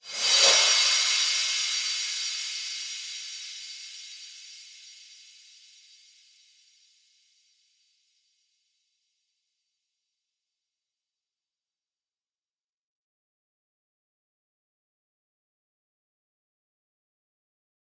Rev Cymb 4 reverb
Reverse cymbals
Digital Zero
cymbal, cymbals, metal, reverse